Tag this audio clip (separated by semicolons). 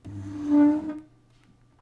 chair; household; interior; scrape; sit; stand; stool